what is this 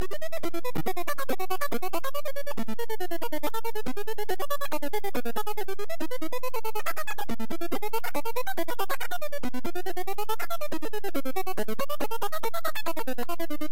Strange Loop
crazy, experimental, loop, loops, psychedelic, synth